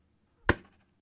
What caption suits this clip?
Arrow Impact 4
Sound of an arrow's impact/hitting its target. Originally recorded these for a University project, but thought they could be of some use to someone.